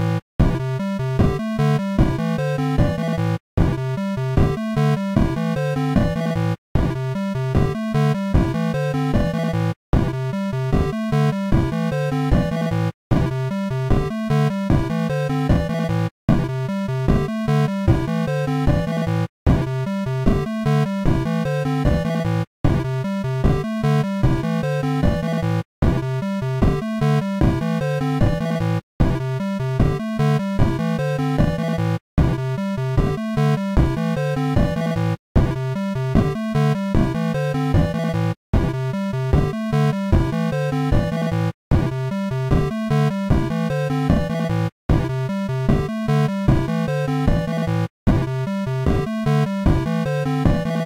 Tough fight
The feeling I got from this - when I was making it but also after - is like...
When you try to get through the end of the day at work - or fighting your way through a dungeon with hordes of Goblins and your arms starting to hurt from swinging the sword.
It's raw. It's tough.
Credits are much appreciated!
I would love to see!
raw, Drum, Electronic, 16-bit, Chiptune, loop, Beats